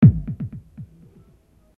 bumbling around with the KC2